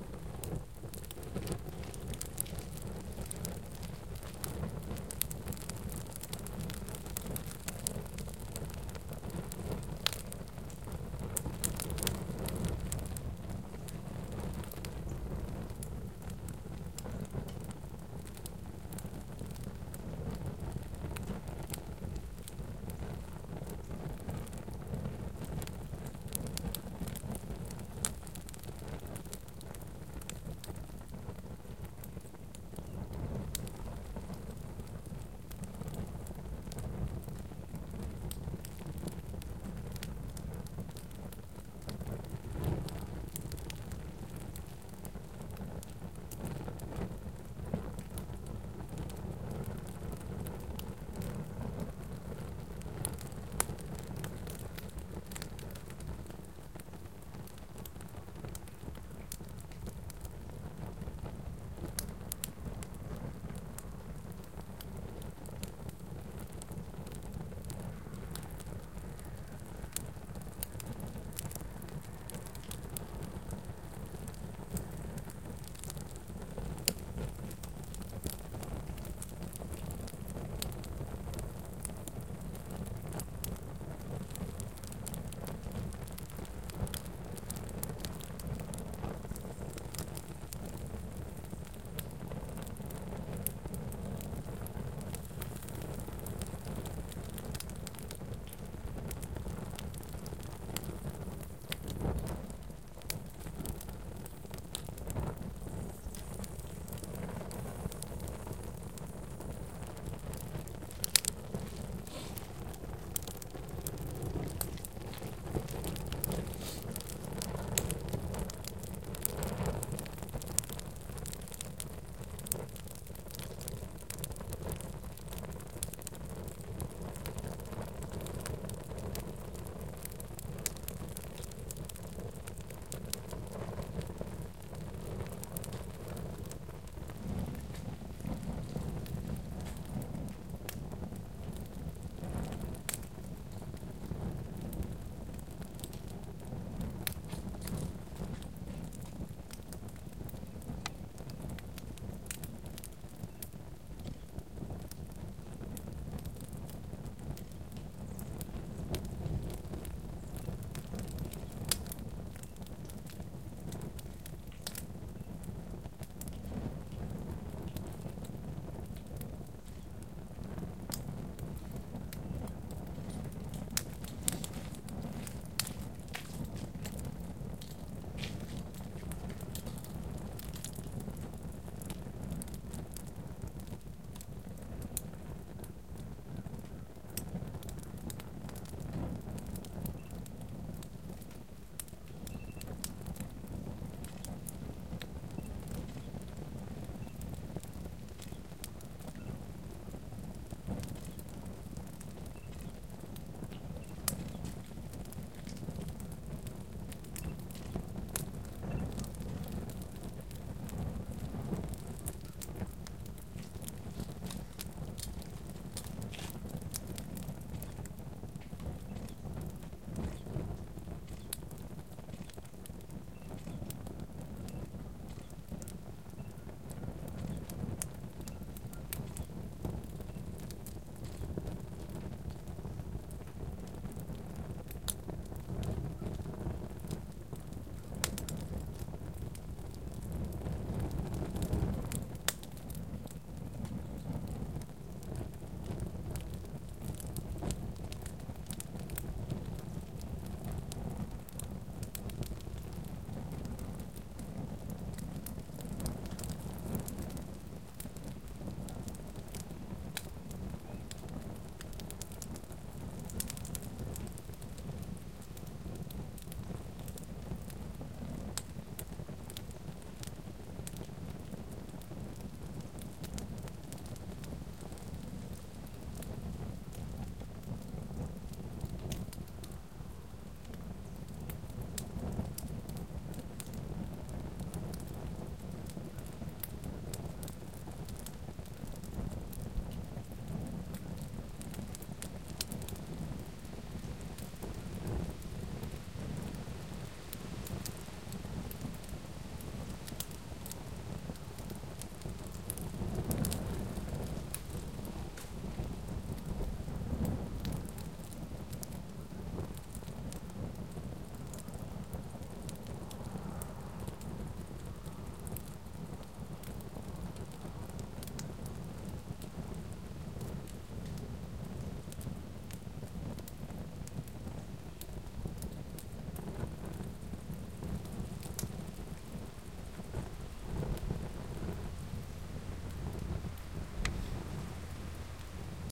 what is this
This is just a stretch of a small fire crackling. There are a few extraneous noises in there (some sniffling, distant car going by, light footsteps, keys, camera noises, and some birds), I just provided the full file so you could edit and use which portions work best for you.